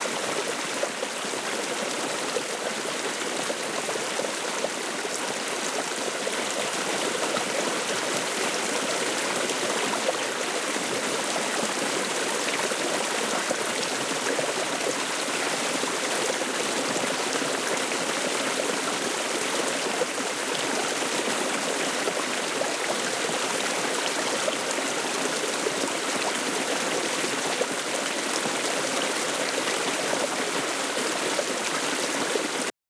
streamlet/stream
river, stream, streamlet, water